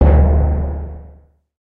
A nice timpani made from scratch in a synth in reason.
awesome, boom, deep, loud, nice, orchestral, sweet, timpani